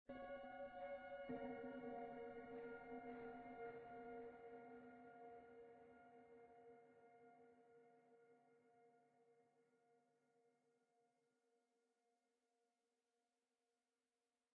ominous ambient
short ambient sound effect created in fl studios.
dark, atmosphere, ambience